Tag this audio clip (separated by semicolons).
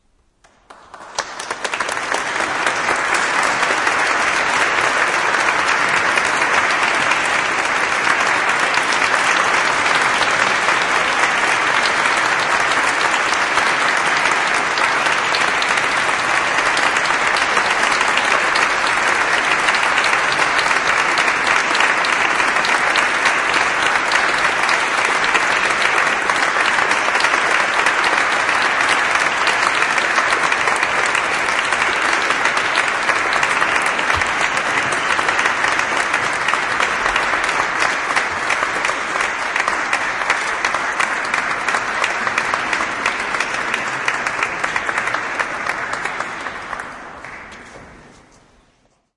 church; applause